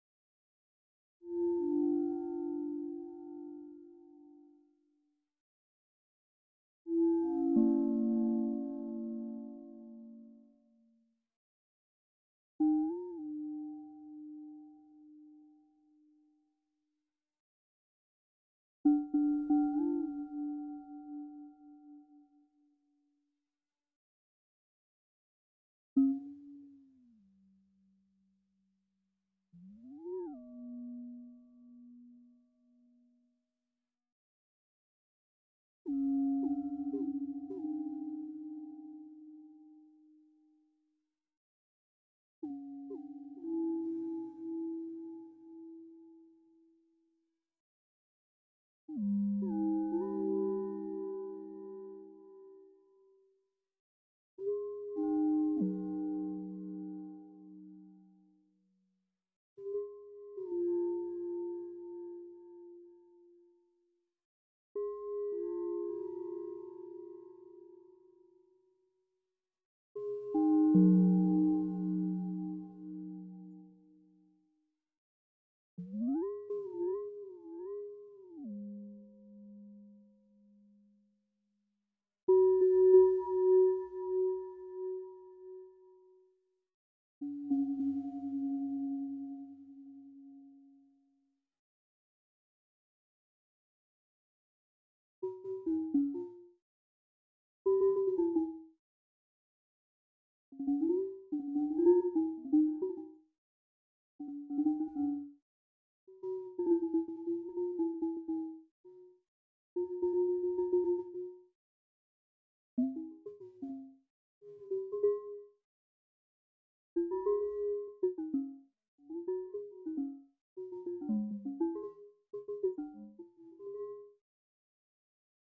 spectralprocessed lamp
Spectralprocessed sample of a porcellaine lamp, played on a wacom tablet
kyma, gong, ding, lamp, spectral-processing